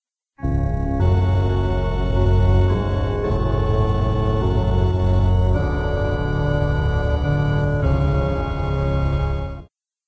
Creepy Organ Loop1
jet another organ loop